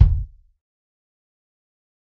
Dirty Tony's Kick Drum Mx 053
This is the Dirty Tony's Kick Drum. He recorded it at Johnny's studio, the only studio with a hole in the wall!
It has been recorded with four mics, and this is the mix of all!
tonys, drum, dirty, punk, tony, kit, pack, realistic, kick, raw